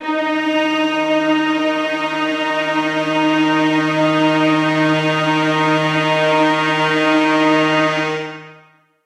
Spook Orchestra D#3
Spook Orchestra [Instrument]
Orchestra, Spook, Instrument